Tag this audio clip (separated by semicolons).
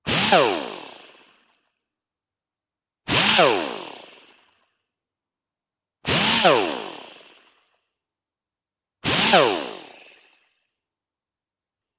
4bar; 80bpm; air-pressure; crafts; labor; metalwork; motor; pneumatic; pneumatic-tools; straight-die-grinder; tools; work